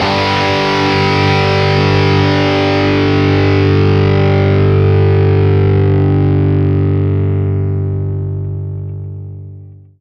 G2 Power Chord Open
Melodic, Electric-Guitar, Distortion